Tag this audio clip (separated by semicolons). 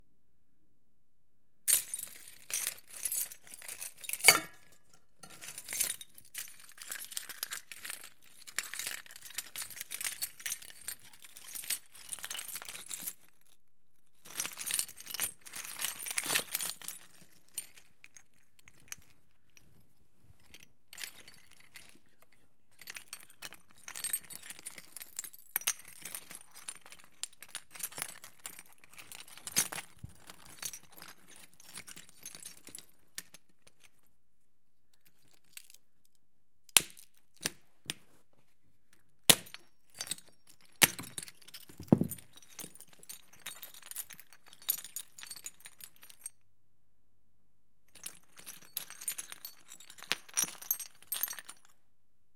pieces; glass; shards